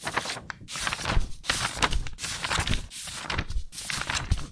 Multiple PageFlips
Sound of me flipping some piece of paper. Useful for spellbooks, journals or notes that require a sound of a piece of paper getting flipped.
Cut it up in the each page flip for better use.
Recorded with a Shure SM58 Dynamic Mic
Book, Page, Paper